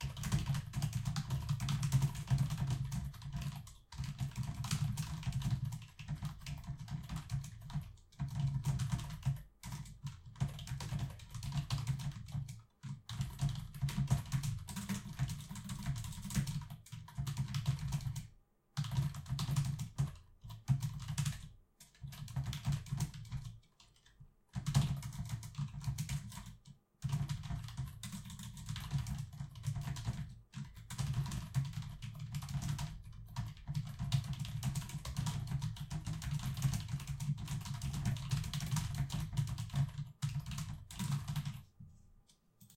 Keyboard Typing (Fast)
This sound is captured with my lovely Blue Yeti up close to my keyboard, while me naturally typing a sample text.
Typing, Fast, Keyboard